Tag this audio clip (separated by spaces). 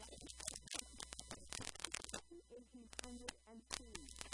broken-toy circuit-bending digital micro music noise speak-and-spell